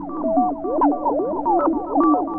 Aquatic electronica interlude. Would do well in a worldbeat or chillout song. Made with TS-404. Made with TS-404.